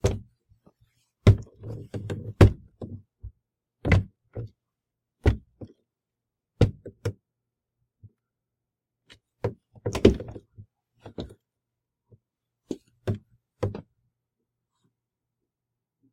Footsteps-Stairs-Wooden-Hollow-01
This is the sound of someone walking/running up a short flight of wooden basement stairs.